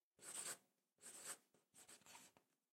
quick lines with marker on paper
quickly drawing some lines with a marker on a paper
fast, quick, paper, lines, drawing